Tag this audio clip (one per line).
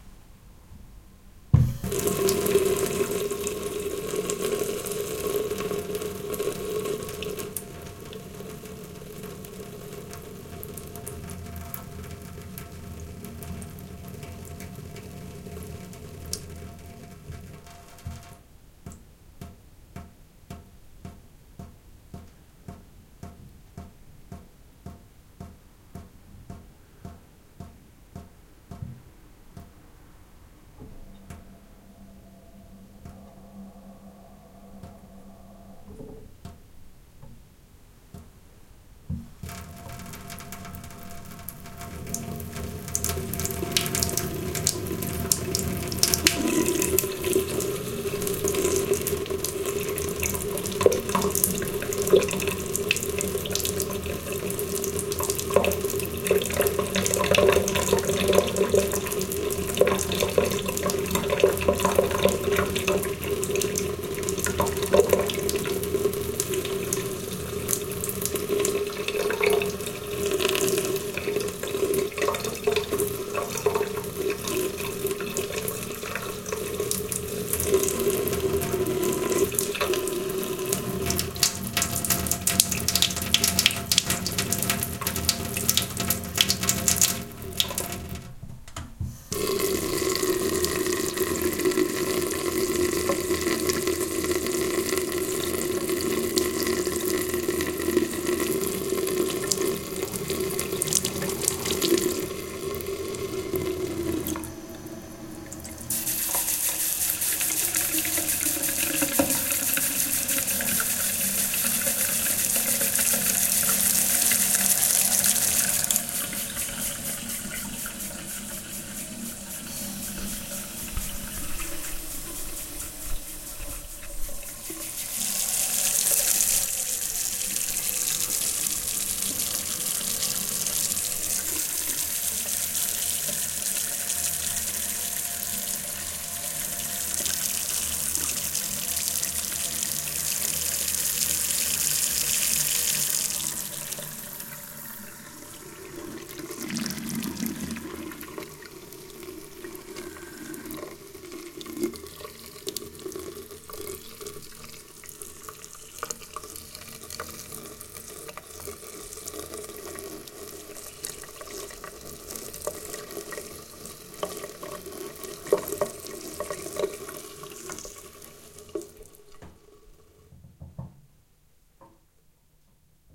double-bowl-sink
dripping
drops
utility-sink
water